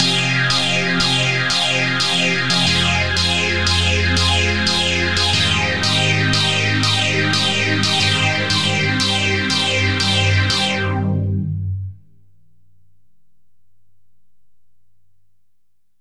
Phaser chord #2
Phaser Chord in Serum